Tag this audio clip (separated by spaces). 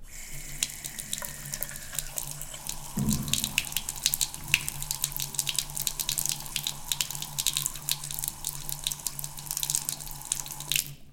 azienka sink toilet umywalka water zlew